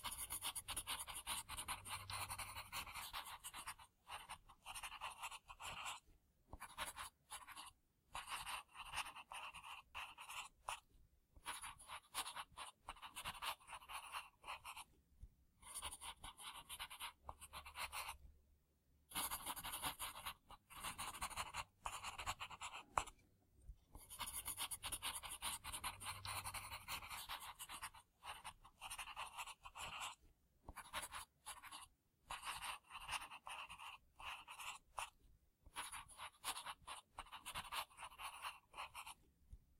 fast pencil writing
The sound of someone writing quickly in pencil on ordinary notebook paper.
draw, pencil, scribble, write, writing